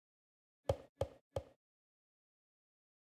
Golpes de mano
mano, golpear, golpe